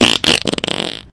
more fart sounds for you to use
poot, fart, flatulation, gas